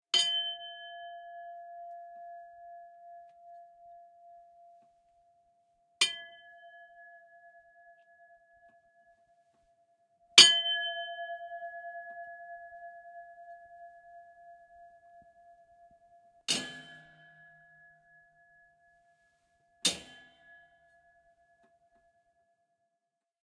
Singing Lid
Recoreded with Zoom H6 XY Mic. Edited in Pro Tools.
A metallic lid collides with metal spoon and disholder. Rings just like a bell.
bell, collision, ding, metallic, percussion, resonating, ringing, sample